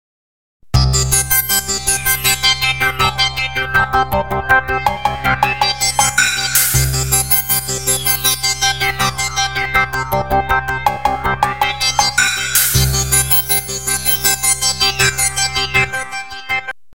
POiZONE 808 COWBELL
Fl-Studio-11, Hip-Hop, Loop, music